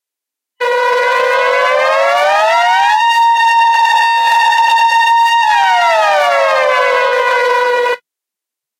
Air Horn
air-horn, siren